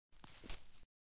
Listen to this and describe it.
Left Grass/Grassy Footstep 6
A footstep (left foot) on a dry grassy surface. Originally recorded these for a University project, but thought they could be of some use to someone.
footsteps, step, Dry-grass, steps, footstep, walk, grassy, bracken, foot, feet, crisp, foot-step, left-foot, grass, field, walking